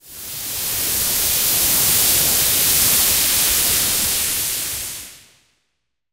CYBER LIP
Noise processed with various Audacity effects until it looked like stereotypical lips in the soundwave view.
paulstretch, meditation, brains, noise, brain, disruptive, intelligence, pulse, kybernetic, meditate, cyber, frequency, cybernetic, cyberpunk, wave, noises, waves